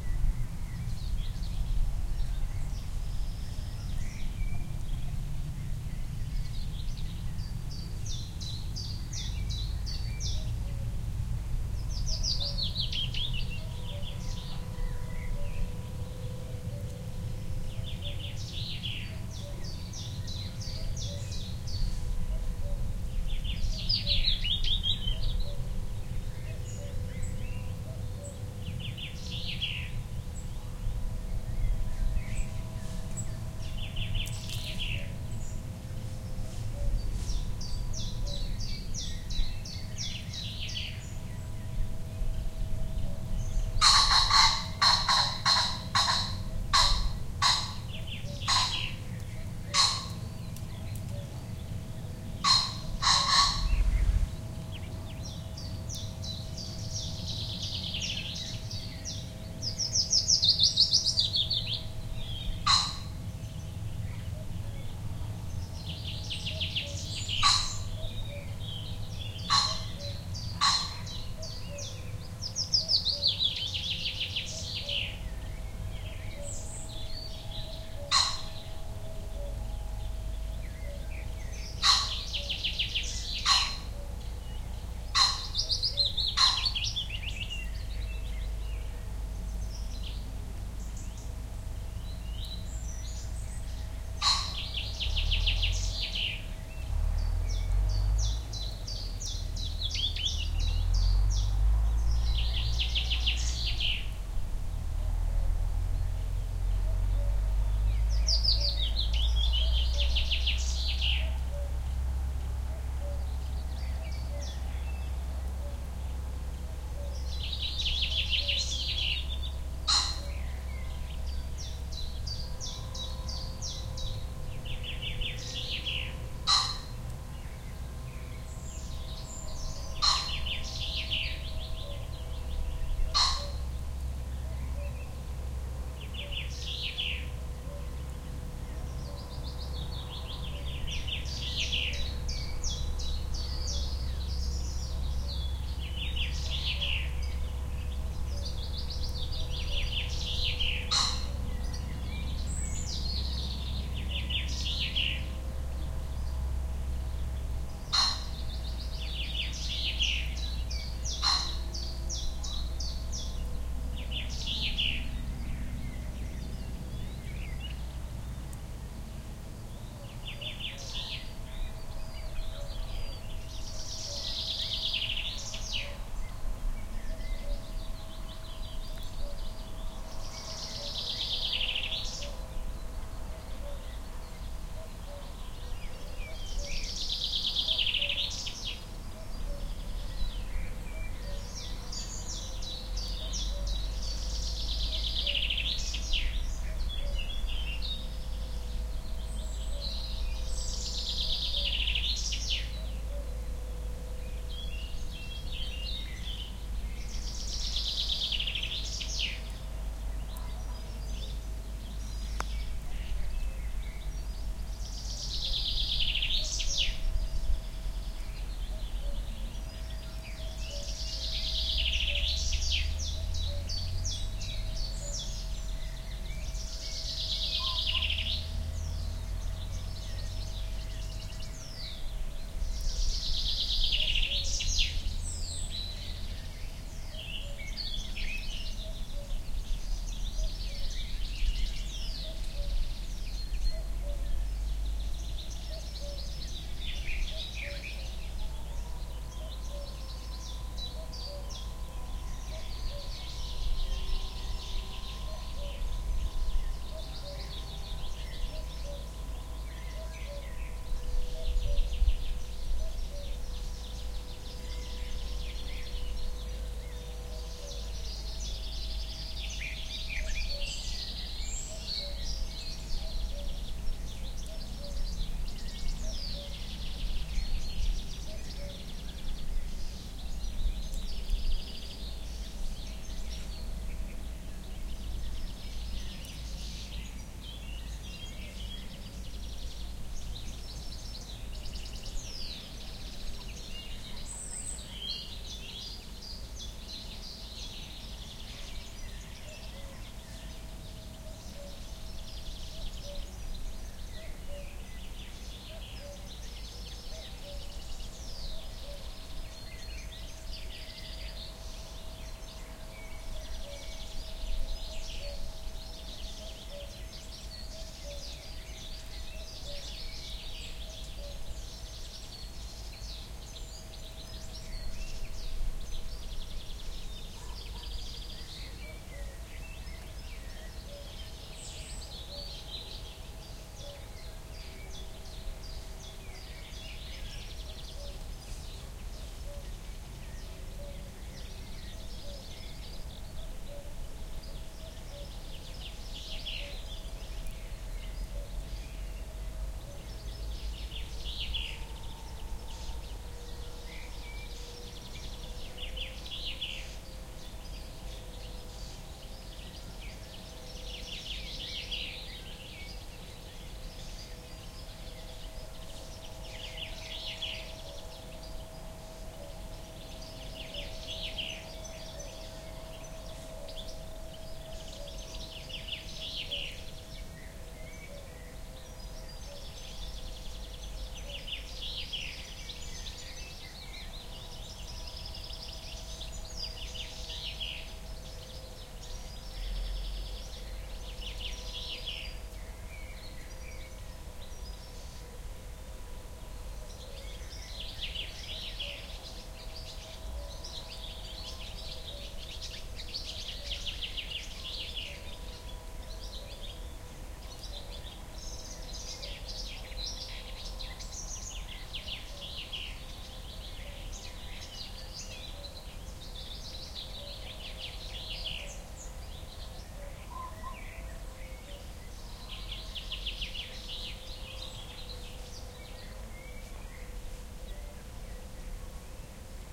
little forest again
Went for a walk again, in a little nice forest. Got a very nice binaural recording of a lot of birds. In the distant background there's a tractor in a field, but it's not polluting the recording.
This was recorded with a Sony HI-MD walkman MZ-NH1 minidisc recorder and a pair of binaural microphones, put on a branch in opposite directions, with some wooden clothes pegs. Edited in Audacity
fieldrecording, birds, forest, wildlife, binaural, wild